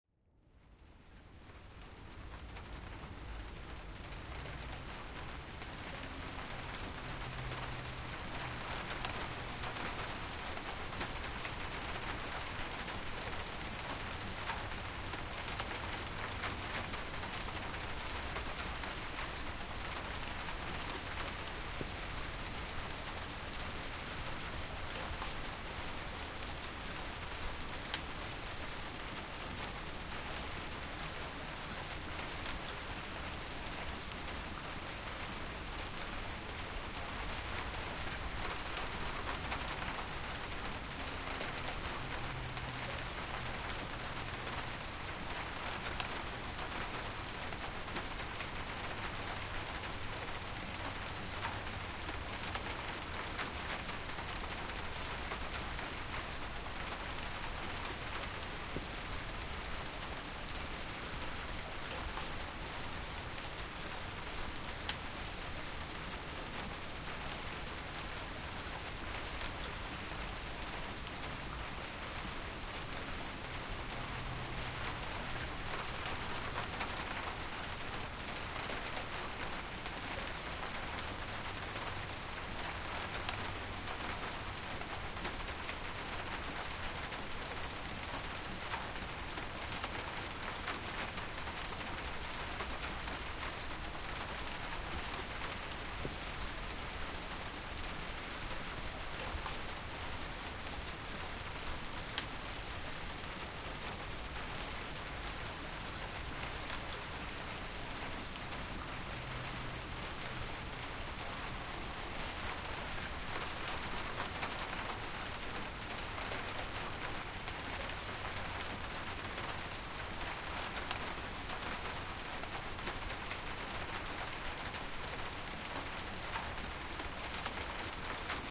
Rain sound effect - Gentle rain on window
Gentle rain on window
CLICK HERE TO SUPPORT AND WATCH VIDEO CLIP OF THIS SOUND:
rainstorm, rain, shower, gentle-rain, raining, storm, light-rain